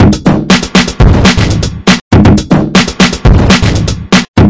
Bruem sink1(dance)B
Another version of my break loop.